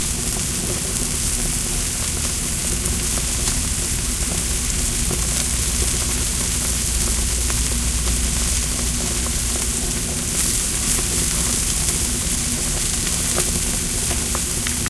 SonyECMDS70PWS grill steak3
cook, digital, field-recording, food, grill, microphone, steak, test, unprocessed